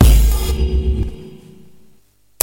The dungeon drum set. Medieval Breaks